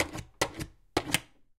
dog - scratch at door - front 03
A dog scratching at a sidelight.
animal, dog, door, scratch, scratched, scratching, sidelight, sidelite, window